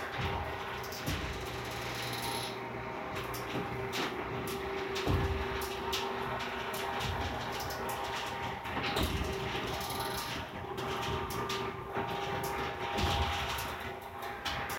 Higher pitched mechanical hum. Captured by recording an electric garage door in operation. Can be used in a factory or industrial environment as a sound effect or ambient noise.